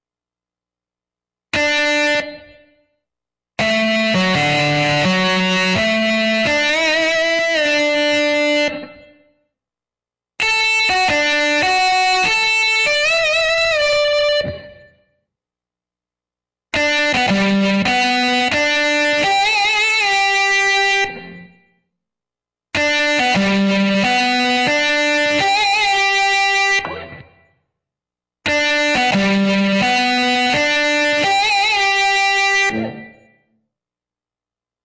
electricguitar starspangledbanner
Solo guitar lick playing the first few notes from star-spangled banner.
banner, electric-guitar, guitar, hendrix, jimi, rock, solo, star-spangled